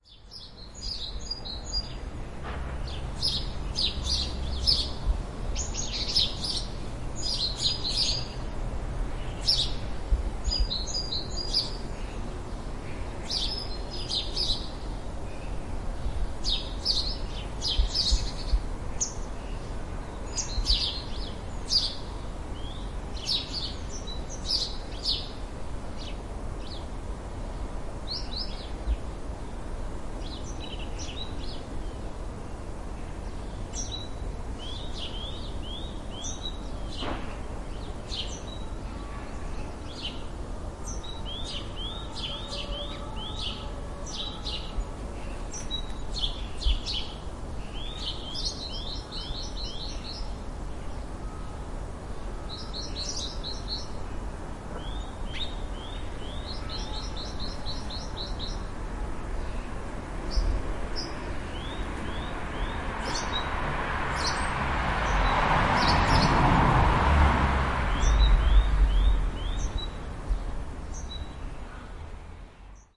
Ambience of garden in a town of France, with birds, traffic and city sounds. Sound recorded with a ZOOM H4N Pro and a Rycote Mini Wind Screen.
Ambiance captée dans un jardin d’une ville française avec des oiseaux, de la circulation et d’autres sons de la ville. Son enregistré avec un ZOOM H4N Pro et une bonnette Rycote Mini Wind Screen.